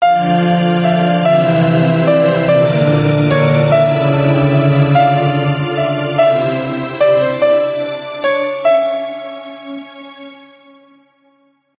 Sytrus with harmony
keyboard, chord